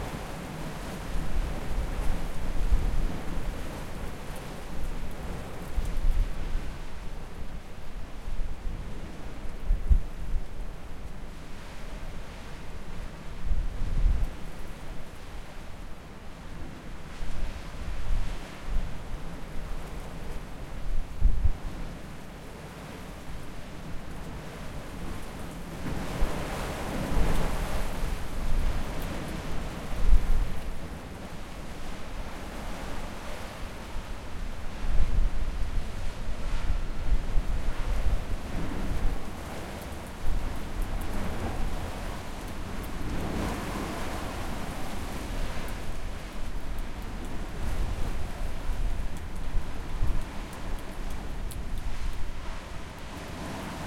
Wind recorded at the end of 2016 in Canary Islands. Strong wind (thanks to the mountain), but it was recorded on the other side of a house and it wasn't that strong in that moment.
There are snorts, sorry (I haven't zeppeling), but there isn't any until 0:54. Hope it's useful for you.
Recorded with a Zoom H4n with its internal stereo mic.
Credit is optional: don't worry about it :) completely free sound.